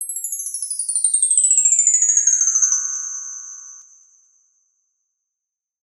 Bar Chimes V4 - Aluminium 8mm - wind
Recording of chimes by request for Karlhungus
Microphones:
Beyerdynamic M58
Clock Audio C 009E-RF
Focusrite Scarllet 2i2 interface
Audacity
bar, bell, chime, chimes, chiming, glissando, metal, orchestral, percussion, ring, wind-chimes, windchimes